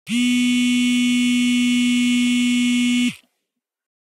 garmin nuvifone vibration recorded on a couch by a B5 with denoize, eq and limiting.
tel, telephone, vibre, cellphone, ringer, smartphone, buzz, phone, alert, ring, vibrate, vibration, alarm, vibrating, cell, mobile